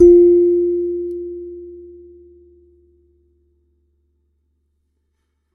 Sansula 04 F' [RAW]
Nine raw and dirty samples of my lovely Hokema Sansula.
Probably used the Rode NT5 microphone.
Recorded in an untreated room..
Captured straight into NI's Maschine.
Enjoy!!!
acoustic, sample, metal, percussion, mbira, raw, simple, kalimba, tines, thumb-piano, sampling, tine, sample-pack, one-shot, note, sansula, recording, single-note